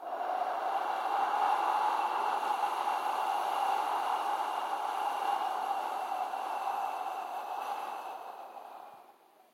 short wind
A wind gust sound made with a little reverb from audicity.
blowing, gust, windy